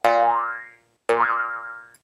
Two cartoon jumps, played with a jew harp.
Dos saltos de dibujos animados, tocados con un arpa de boca.
boing, bounce, golpe, harp, Jew-harp, muelle, silly, spring